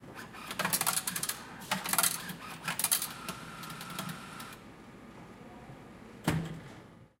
20141119 money-back H2nextXY
Sound Description: Vending machine money-back
Recording Device: Zoom H2next with xy-capsule
Location: Universität zu Köln, Humanwissenschaftliche Fakultät, Building 213, 1st Floor
Lat: 50.933611
Lon: 6.920556
Recorded and edited by: Alexej Hutter